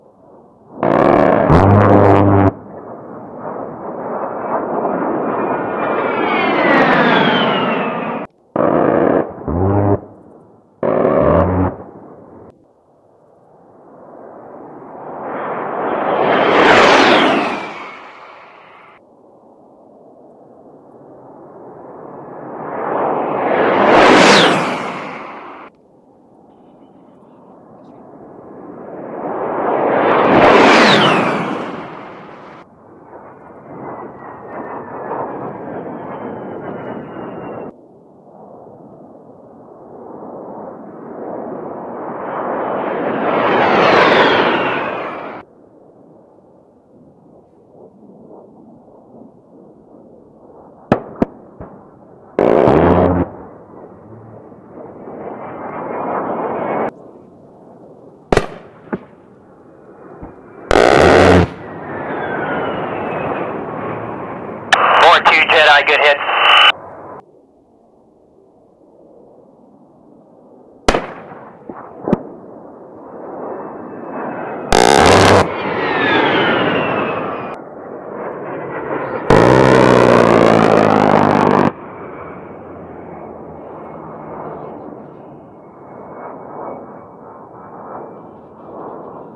A-10 Warthog flyby and shooting.